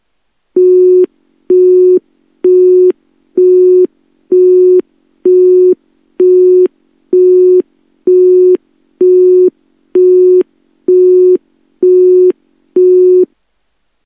Telephone busy tone recorded on a UK phone
busy, ring, telephone, tone, uk